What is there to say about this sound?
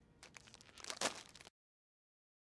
opening plastic Bag
opening a plastic bag
bag, wrap, plastic